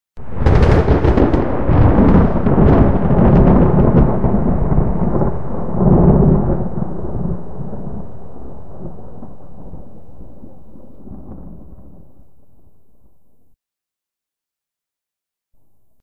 Thunder Clap 5
Single thunder clap.
thunder-clap, strike, Thunder